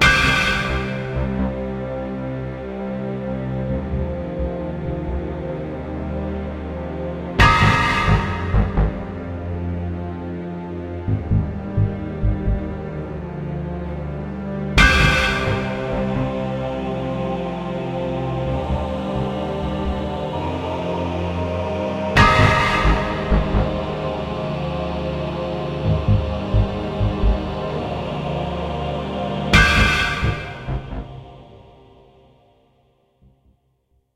muvibeat5 130BPM
made with vst instruments
ambience, ambient, atmosphere, background, background-sound, beat, cinematic, dark, deep, drama, dramatic, drone, film, hollywood, horror, mood, movie, music, pad, scary, sci-fi, soundscape, spooky, suspense, thrill, thriller, trailer